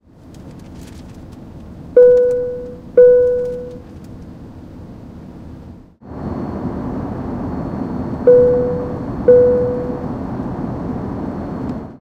Fasten Seatbelt Sound
A signal on the plane indicating that seatbelts should be fastened or unfastened. Recorded with Zoom H2. Edited with Audacity.